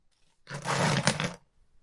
Coin Move - 3
Coins being moved around
buy, coin, coins, ding, drop, dropping, falling, finance, metal, money, move, pay, payment, shop